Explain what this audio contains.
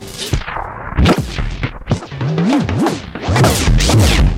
Severe crunchy "scratching" of tom roll.Taken from a live processing of a drum solo using the Boss DM-300 analog Delay Machine.
analog, glitch, lofi, warped